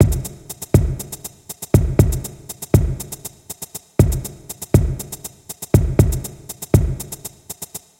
Just a drum loop :) (created with flstudio mobile)
beat, drum, drums, dubstep, loop, synth